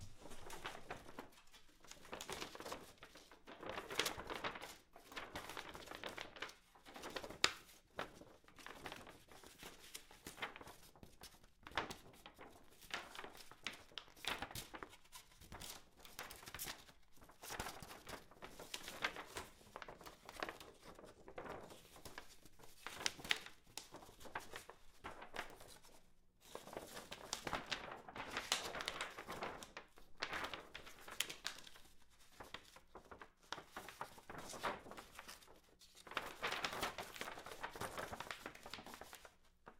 foley paper sheet of glossy poster paper flap in wind India
flap, foley, glossy, India, paper, poster, sheet, wind